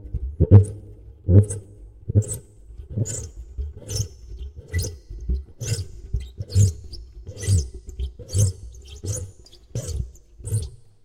The putipù is a percussion instrument used in Neapolitan folk music and, generally speaking in the folk music of much of southern Italy. (An alternative name is "caccavella".) The name putipù is onomatopoeia for the "burping" sound the instrument makes when played. The instrument consists of a membrane stretched across a resonating chamber, like a drum. Instead of the membrane being stuck, however, a handle is used to compress air rhythmically within the chamber. The air then spurts audibly out of the not-quite-hermetic seal that fastens the membrane to the clay or metal body of the instrument.